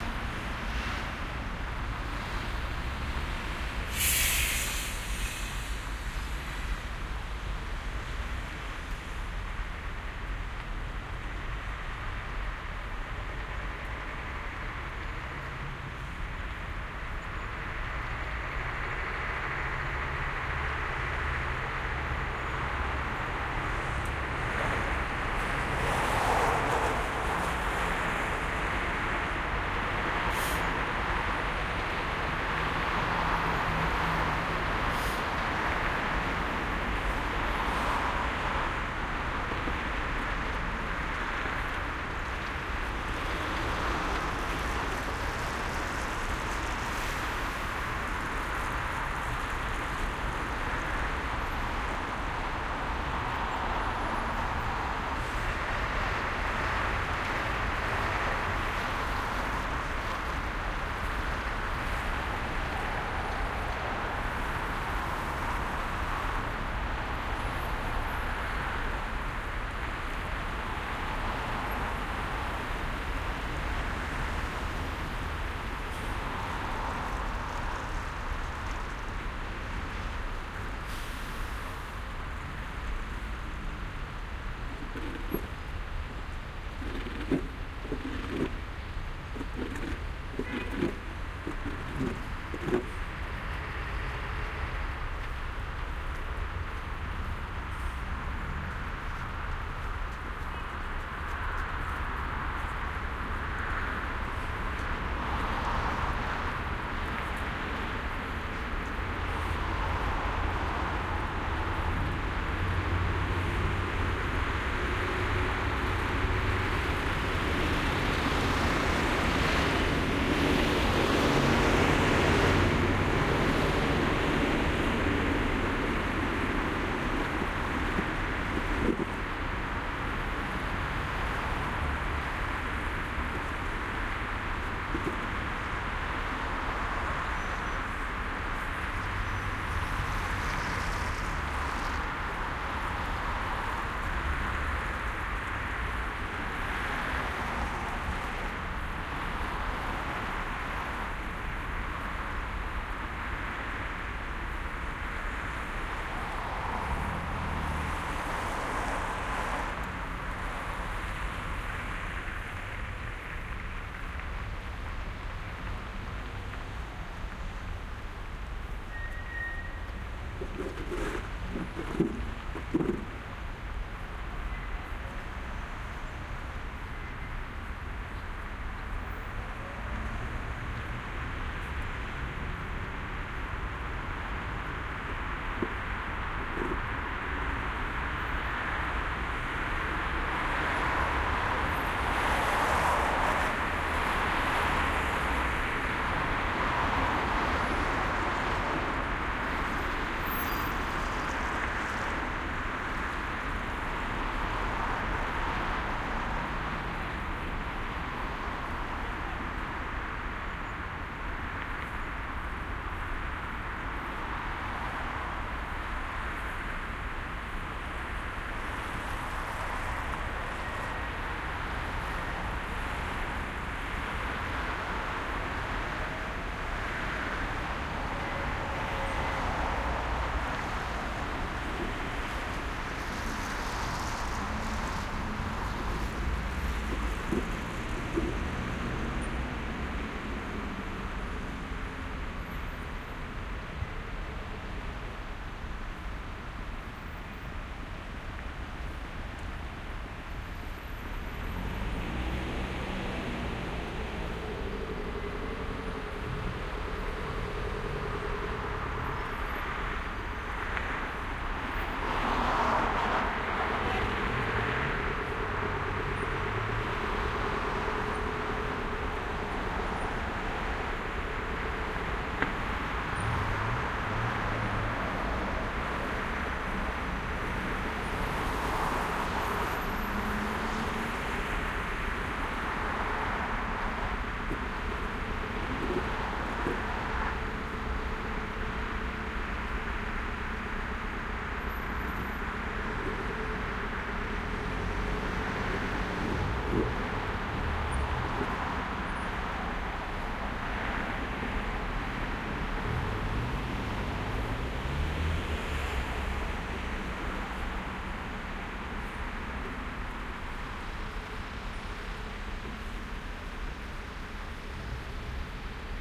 Moscow heavy traffic with some garbage man in background
Heavy traffic from some distance with some garbage collecting in background